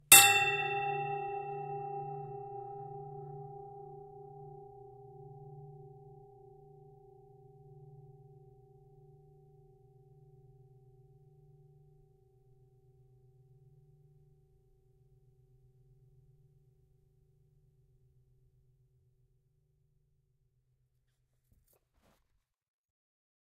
etl ABIACUIIS 2 24-96
A bell like metallic ring. Metal riser railing hit with umbrella. (2)
bell, railing, ring, risers